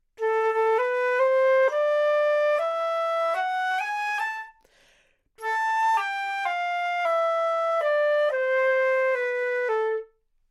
Part of the Good-sounds dataset of monophonic instrumental sounds.
instrument::flute
note::A
good-sounds-id::7031
mode::natural minor
Intentionally played as an example of bad-tempo-legato